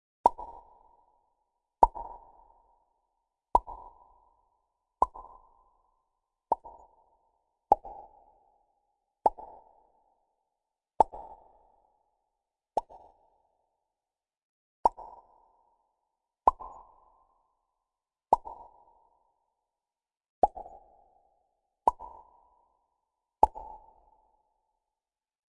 mouth pops - wet - warehouse
A popping sound made with my mouth with a little warehouse reverb.
lips, pop